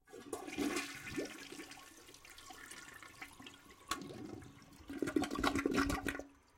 toliet flush 1-2
flushing a toilet in a small that room
a, toliet, flushing